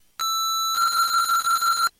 sample of gameboy with 32mb card and i kimu software